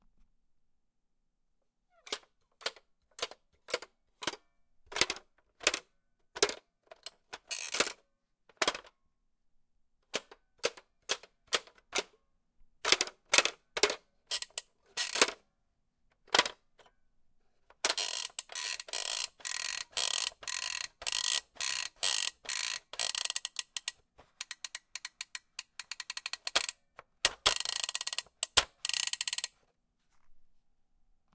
squeaky popup toy02
My wife using a pop-up animal baby toy. Each animal pops up with a different type of button. One of them is like a key that you have to turn and makes some nice rasping noises as you turn it.
burst, click, clicking, flip, pop, popping, rasp, rasping, snap, toggle, twist, twisting